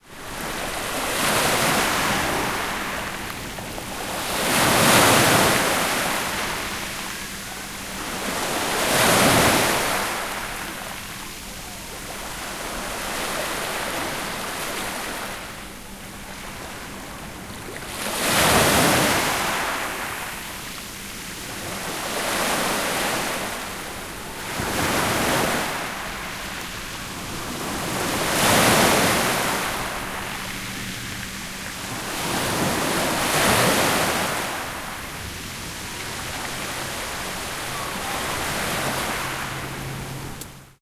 Son de la mer Méditerranée. Son enregistré avec un ZOOM H4N Pro et une bonnette Rycote Mini Wind Screen.
Sound of a Mediterranean Sea. Sound recorded with a ZOOM H4N Pro and a Rycote Mini Wind Screen.
sea, seaside, mediterranean, south-of-france, waves, wave